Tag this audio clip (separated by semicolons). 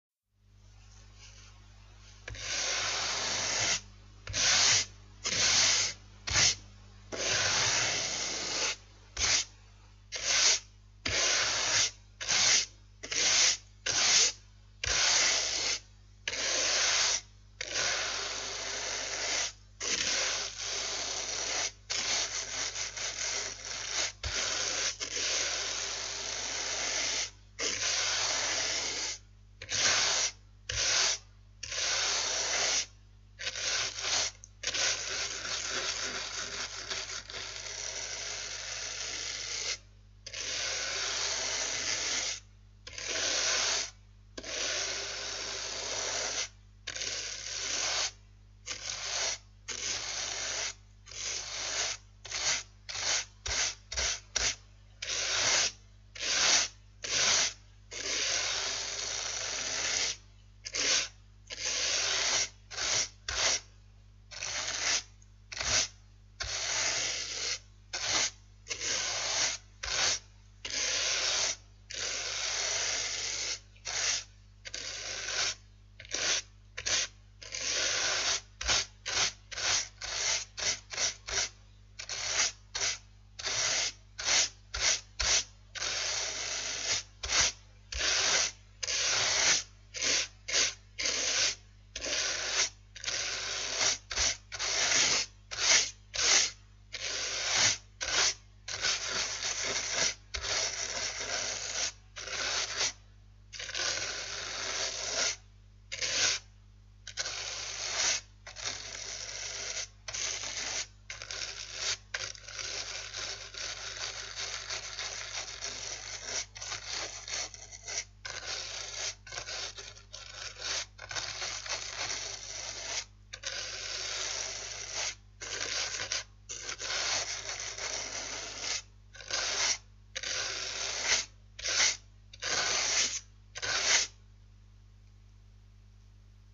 bristle-brush; brushing; scrape